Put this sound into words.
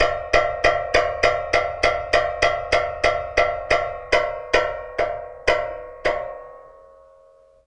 radiator hits fast
Hitting a large radiator with a car key. Recorded with a contact mic into a camcorder.
clang, hit, metallic, radiator, ring